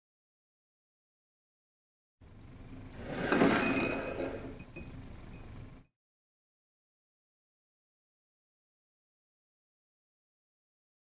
Glass Passing
Glass, Passing